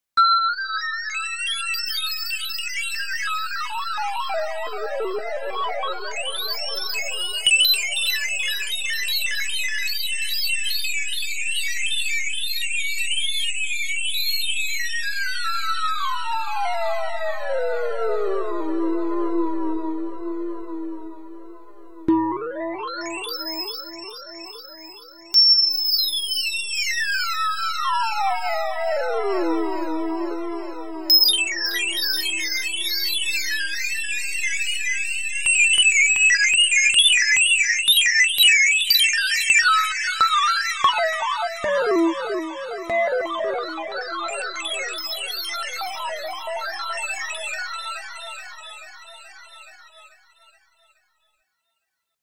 Sick Synthetic Shooting Stars
A trippy FM bell / shooting star effect
effect, fall, FX, glissando, glitter, pitch, rise, shimmer, space, starfall, stars, synth, trippy